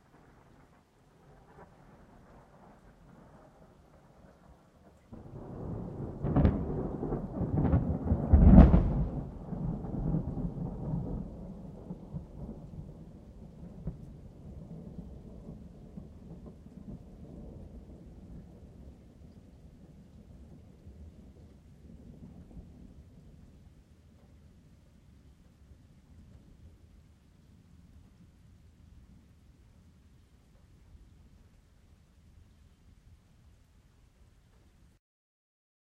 rain far thunder ambience dripping trop-001
ambience, dripping, far, rain, thunder, trop